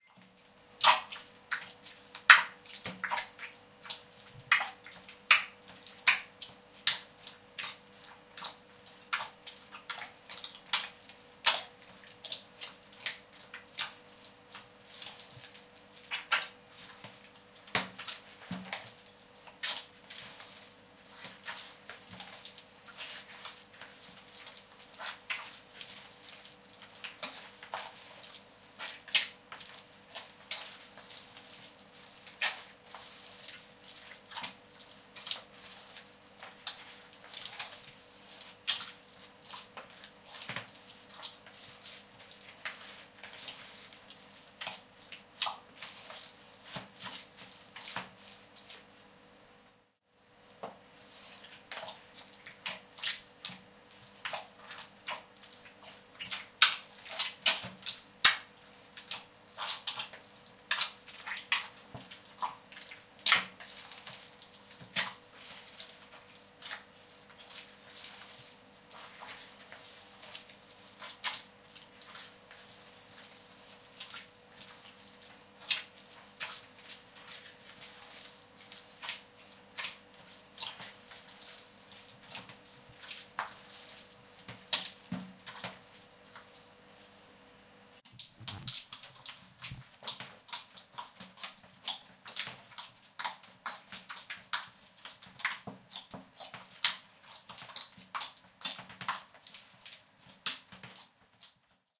The Sound of Baking
XY stereo recording of baking procedure.
Recorded via a handheld recorder Zoom H2n, on Wednesday April 8th, 2015.
Location: Thessaloniki, Greece
baking, field-recording, pastry